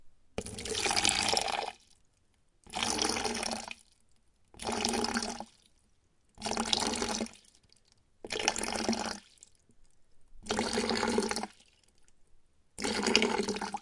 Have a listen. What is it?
Agua siendo transpasada de un recipiente a otro
fall, liquid, water